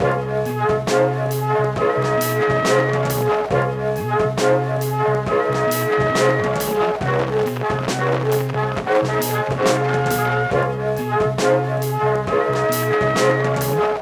Why have one silly Blue Danube loop, when you can have 2?
Why am I doing this? - I don't know. Because it is fun...